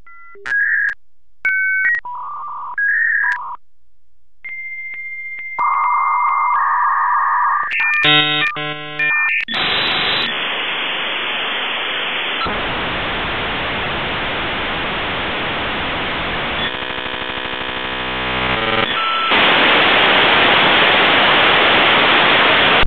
Dial up tones are probably a dime a dozen but they can be unique and make neat sounds for glitch music.
dial, glitch, huh, modem, noise